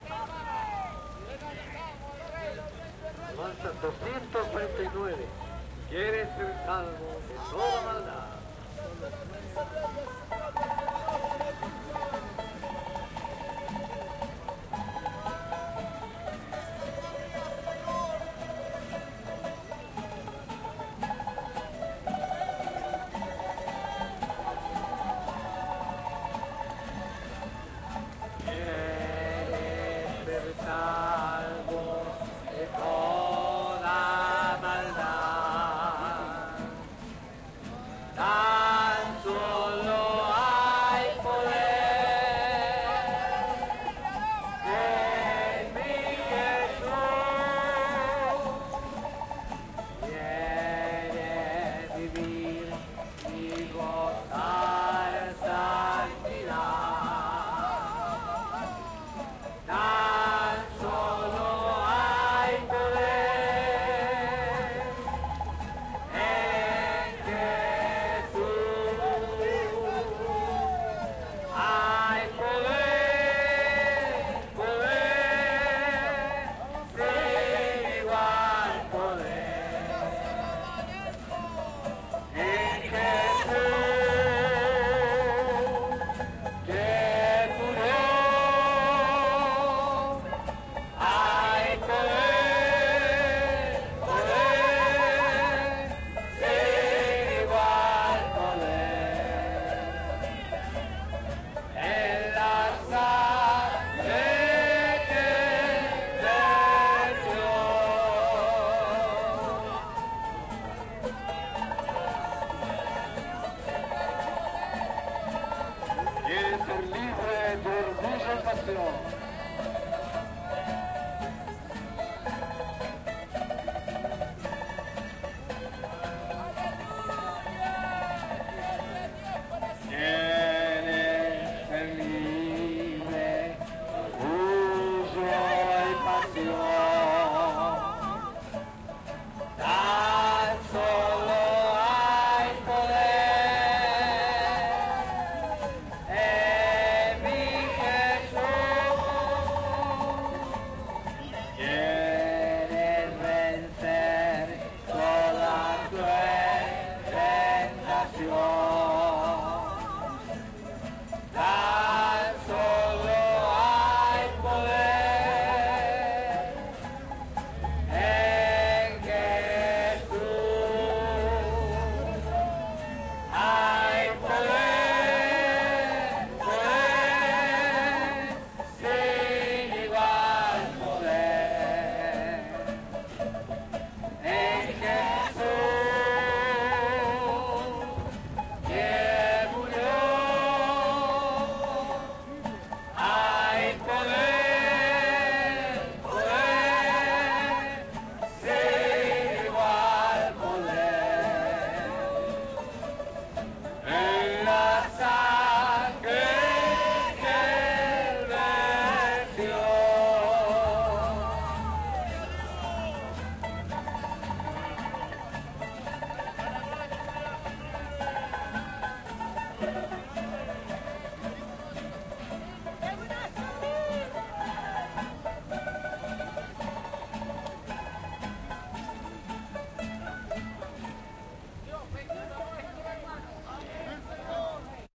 evangelicos 01 - hay poder (cancion)
Evangelicos cantando y proclamando en Plaza de Armas, Santiago de Chile, 6 de Julio 2011.
Gospel singers in Plaza de Armas, Santiago of Chile.